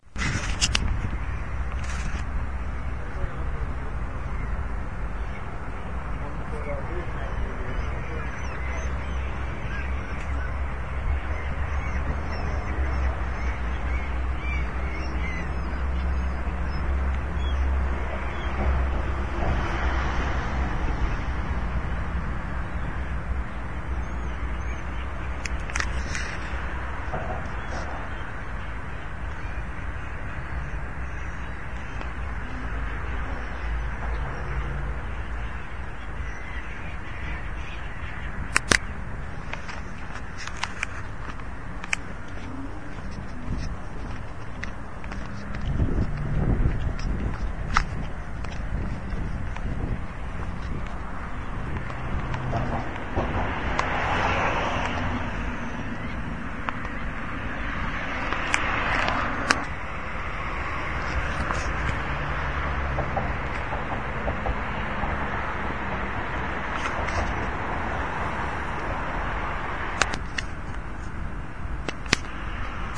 Recorded from Chainbridge, Budapest.You can hear seagulls under the bridge and cars on the bridge behind me.